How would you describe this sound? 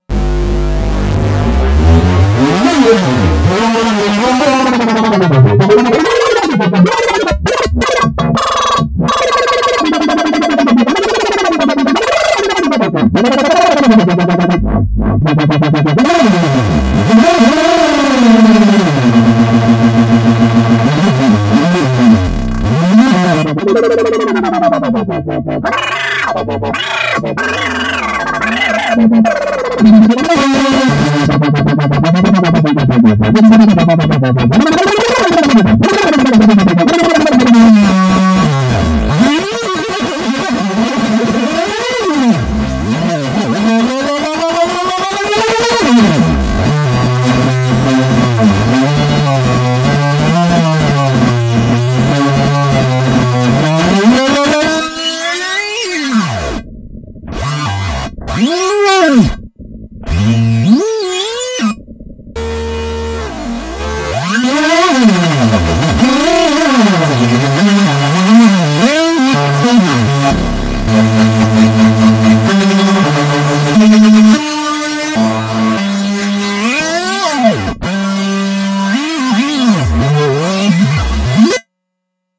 Angry Wobbles
angry, Blah, dubstep, Ex, rant, Wife, wobble